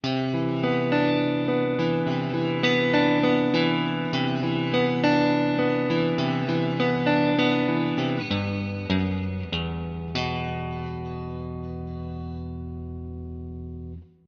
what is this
indie riffin 101bpm
recorded with cheap guitar. please use in your samples or something else
bye
guitar, indie, pling, plong, riff, rock